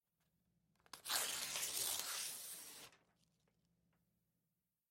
Rompe hoja de cuaderno
Papel, cortar, tijeras